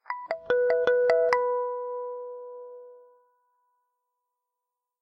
Taylor Guitar Harmonics Pluck 01
Harmonics plucked on a Taylor guitar.
Harmonics, Pluck